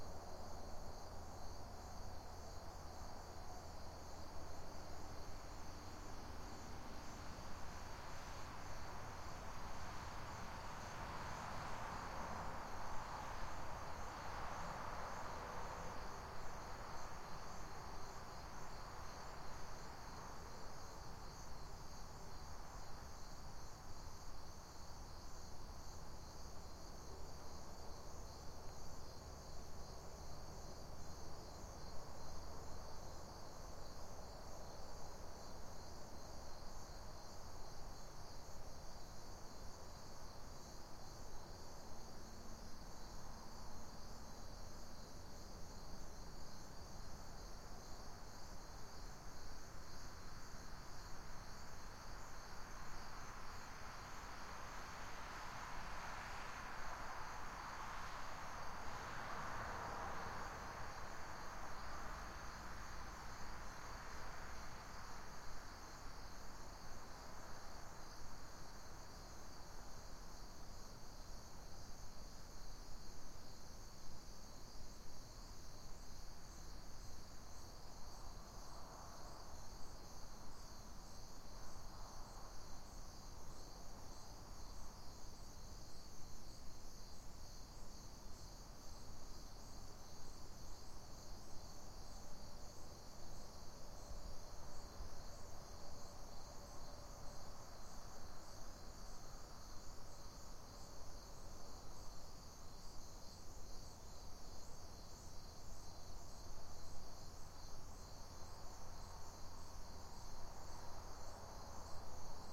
Hilden, night, open field crickets, light traffic
Hilden. Night, open field crickets, truck drive-by. This sample has been edited to reduce or eliminate all other sounds than what the sample name suggests.
light-traffic, crickets, field-recording, night